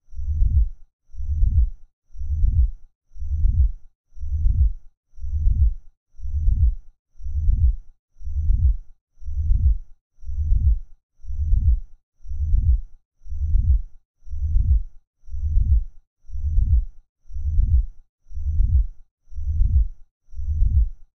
Dinosaur Heart Beat from wind sound record use Zoom H1 2013.
dinosaur, dragon, heart